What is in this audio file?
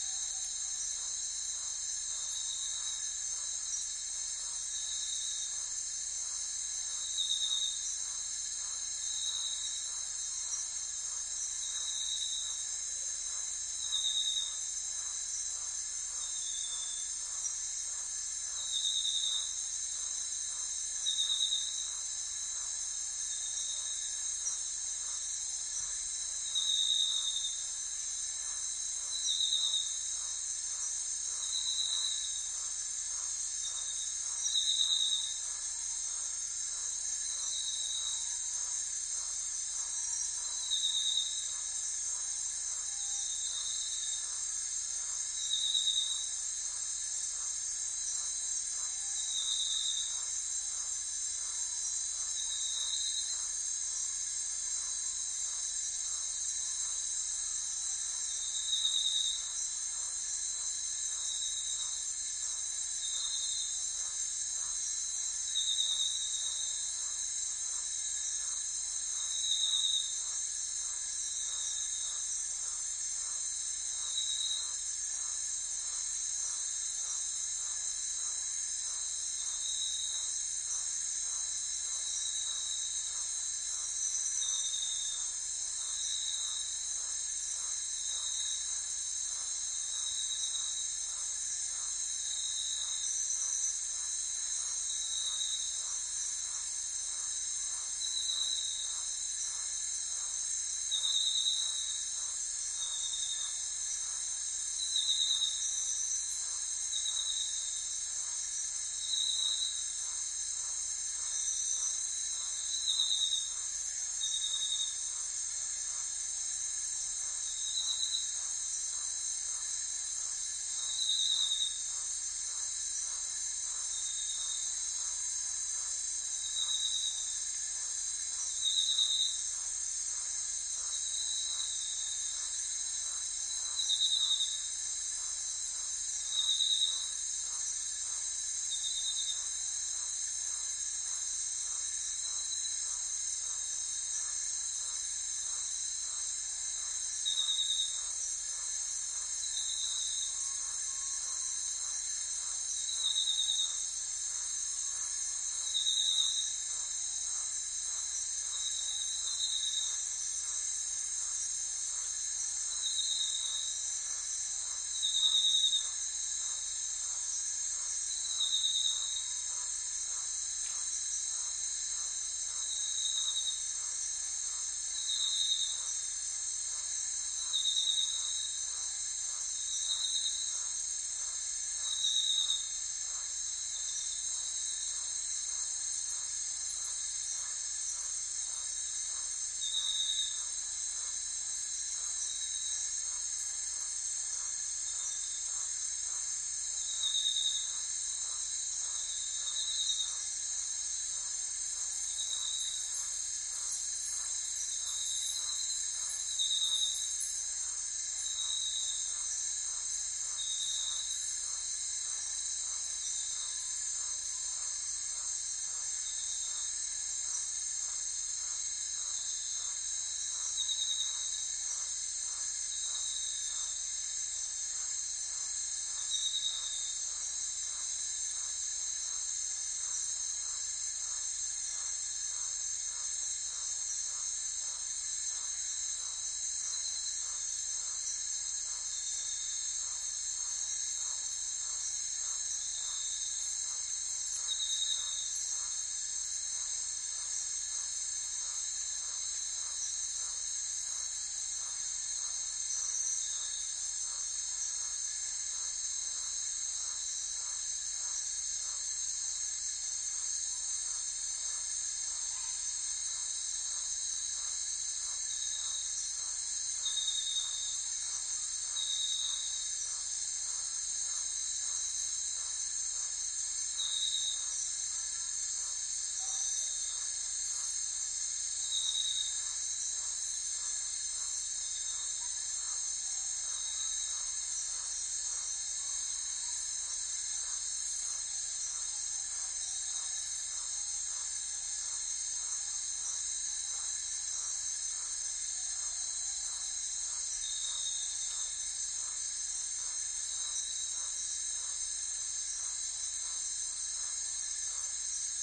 Amazon jungle night crickets birds frogs